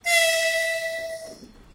Train Horn
This is a horn of a train moving by.
There was also a sound of caution, like a small telephone, to let everyone know a train is approaching, a noise again (there's always this noise, I'm sick of it), very quiet car engines and a heavy sound hitting the rail of the train itself - these are all the sounds I "erased" with denoising function to get a clearer main sound - of the horn of the train. Of course I couldn't make it crystal clean.
It was recorded in the March of 2014. Quite far from the city, it was still a bit cold, and dry, but the spring had been approaching already, on this sunny morning.